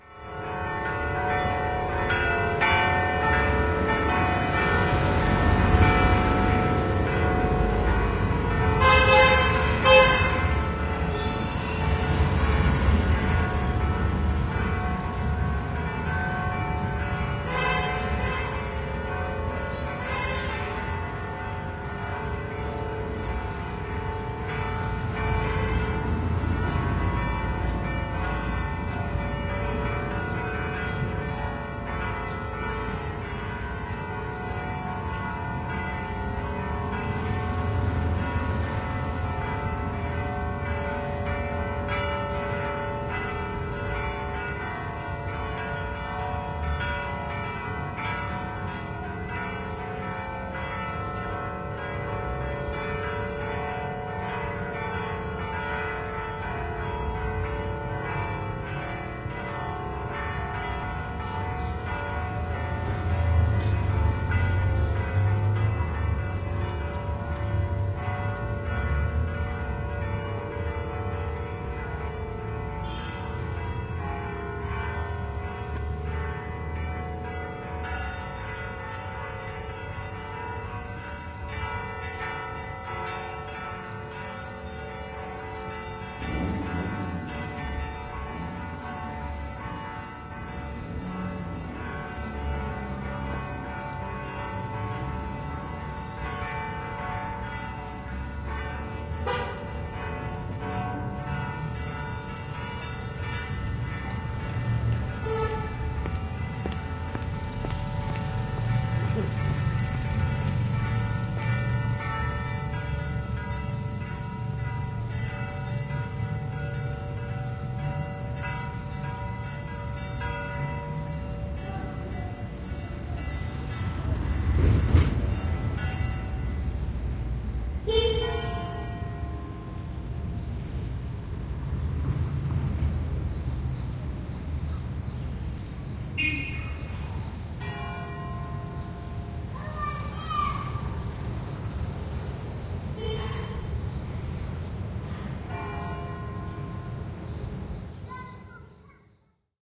Malta, katu, kirkonkellot, kaupunki / Malta, church-bells in the city, cars in the street, horns, St. Augustin church
Kirkonkellot soivat vähän kauempana. Katuhälyä, autoja, auton torvia, vähän askeleita. Taustalla lintuja. St. Augustinin kirkko.
Paikka/Place: Valletta
Aika/Date: 10.04.1989